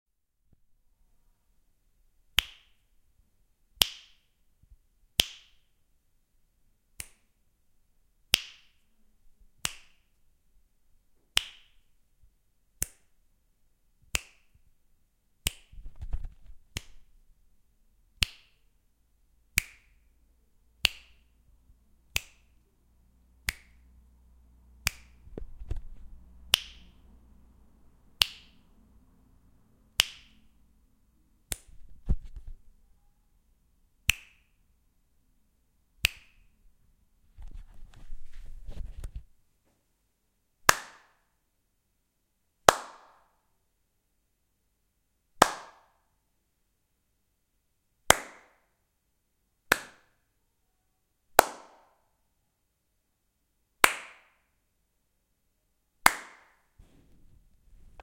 Fingersnaps and claps recorded in a medium room with a bit of reverb. Recorded with Pocketrack cx
sound, reverb, clap, finger, snap, fingersnap, hands, snapping